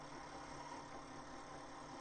16 REWIND LOOP
Recording of a Panasonic NV-J30HQ VCR.
cassette, loop, pack, recording, retro, tape, vcr, vhs